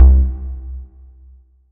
BASS RVB 1
reverb, bass